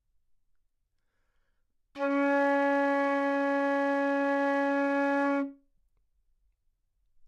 Flute - Csharp4
Part of the Good-sounds dataset of monophonic instrumental sounds.
instrument::flute
note::Csharp
octave::4
midi note::49
good-sounds-id::3023
single-note, neumann-U87, good-sounds, Csharp4, flute, multisample